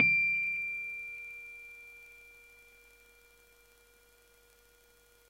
just the single note. no effect.
note, rhodes